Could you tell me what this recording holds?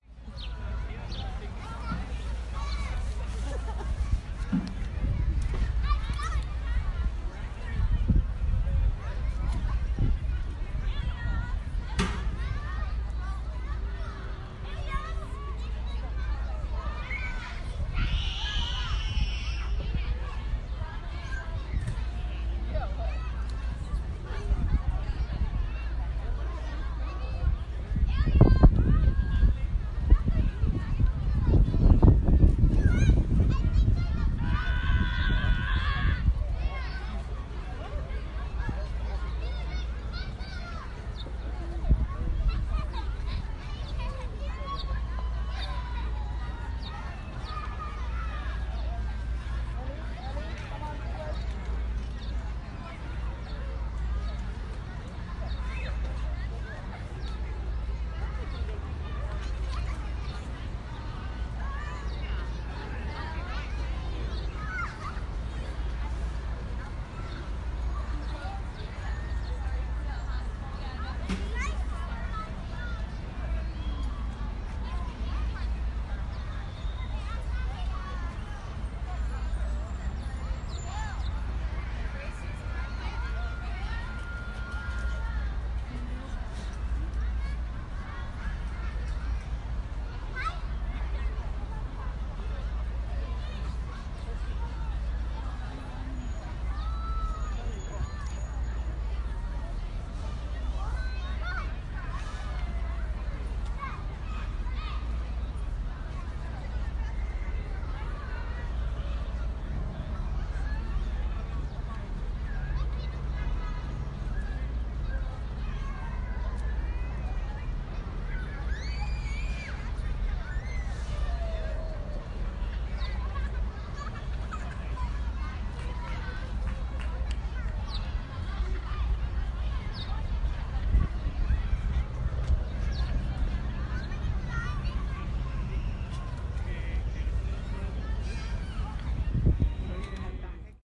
FieldRecording-NearParkwChildren
NYC Central Park - A day in Spring, waiting by the playground as children are playing and people are walking. Taken on an iphone. Children laughing and shrieking, being joyful.
park, people, central-park, atmosphere, soundscape, playing, ambience, children, playground, field-recording, ambiance, NYC